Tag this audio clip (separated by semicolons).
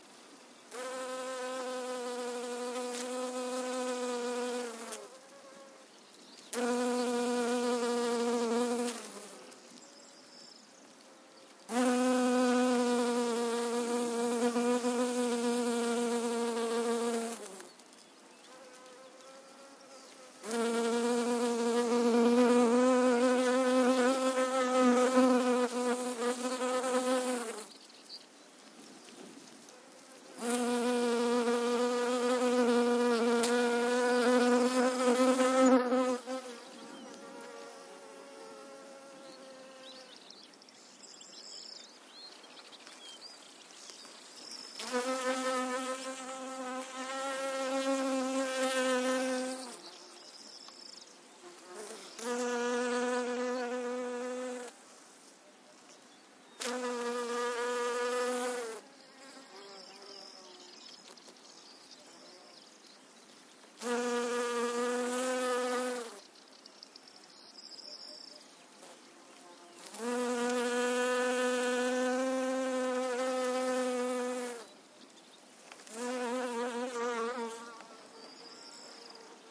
insects; spring; honeybee; pollination; nature; donana; flowers; field-recording; birds